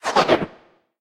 Robot machine transform 2
machine-like sound of parts moving